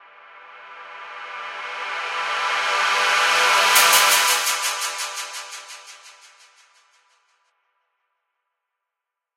Paris Sweep
Sweeping sound effect rich with high frequencies and stereo imaging. Works within any scale.
electronic, fx, sweeping, wet